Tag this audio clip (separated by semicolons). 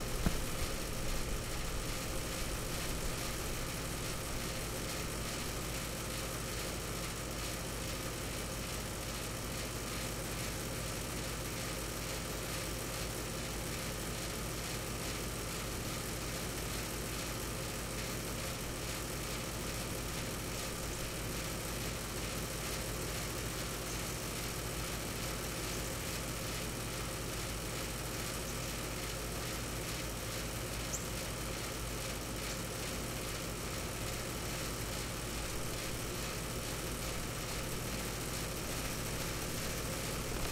mechanical; MOTOR